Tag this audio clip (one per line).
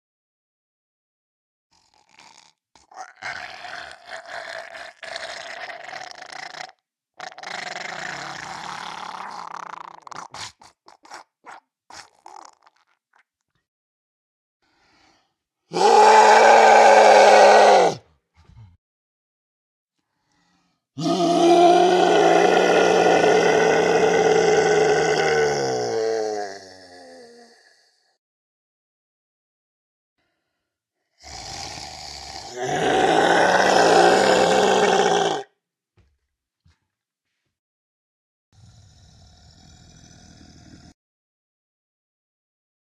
beast
creature
horror
monster
roar
undead
zombie